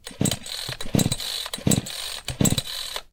Field recording on an 18" 2-stroke gas chainsaw.